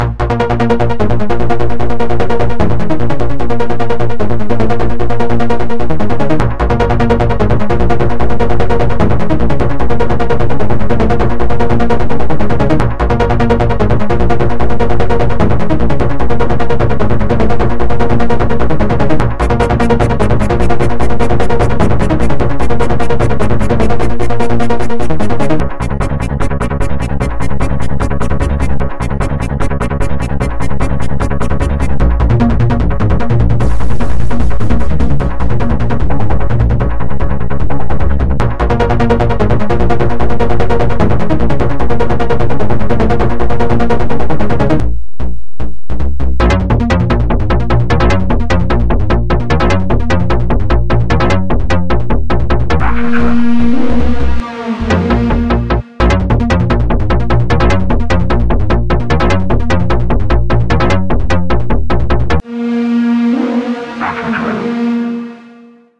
morph 3' demo
150bpm, bass, Bassdrum, bassline, beat, demo, fragment, hard, music, sample